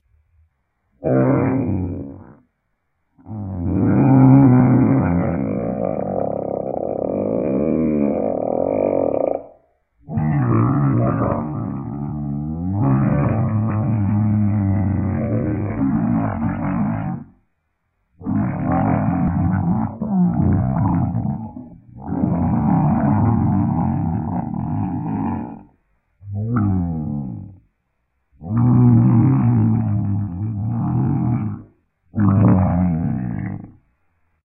I Need to Eat Something...
Ooooooooooooooooooooooooooooooooooooooooooouuuuuuuuuuuuuuuuuuuuuuuuuuuuuuuggggggggggggggggggggghhhhhhh!!!!!!!!!!!!!!!!! Uggggggggggh! 260 whole hours without food, my grumbling stomach is very hungry and angry. Seriously, I need to eat something, but I decided to wait a while longer to eat.
grumbles, borborygmus, hungry, moan, starving, moaning, females, rumbles, borborygmi, starvation, belly, growling, recording, rumbling, grumbling, sound, roars, sounds, roaring, grumble, growls, soundeffect, growl, humans, tummy, moans, stomach, roar, rumble